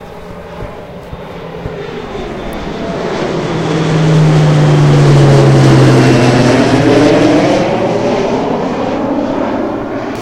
vueling airlines
The sound of a plane landing. Recorded with a Zoom H1 recorder.
airplane, airport, Deltasona, landing, plane, pratdellobregat